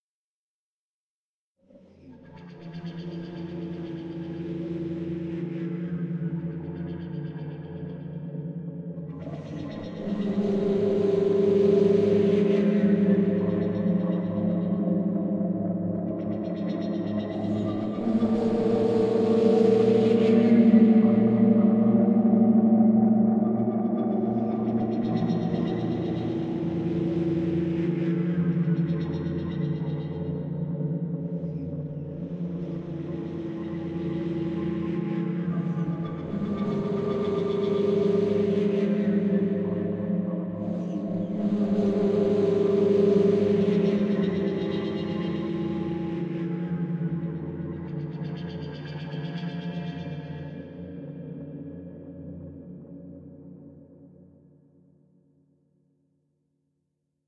I added couple freaky sounds together a few notes and tweaked till I got This. I think it can build tension in a movie. Done in Music Studio.